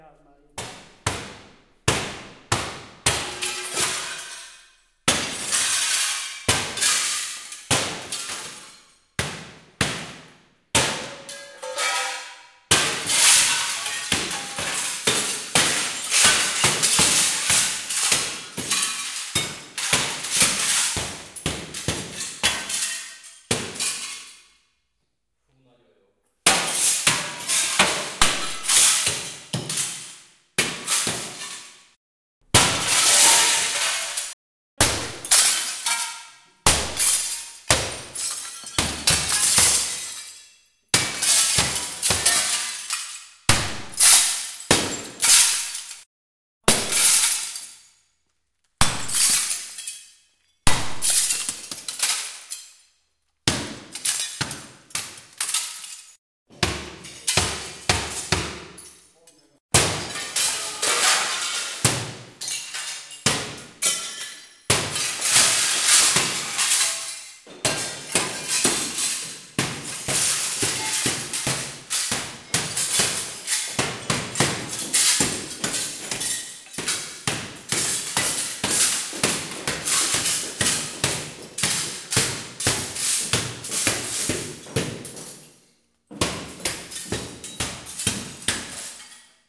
Break Mirror002
44.1/16bit, Breaks huge mirrors.
44-1, 16bit, window, glass, smash, breaking, mirror, break